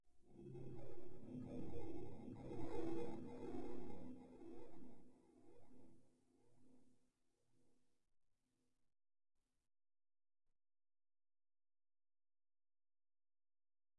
I use this sound for mij computer, when a device is connected to it.